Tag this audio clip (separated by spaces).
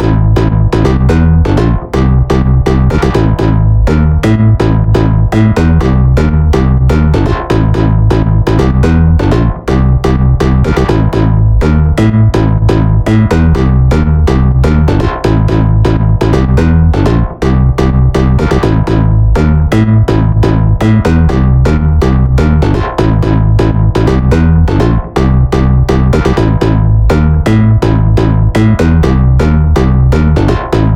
club drop edm electro electronic futurehouse house techno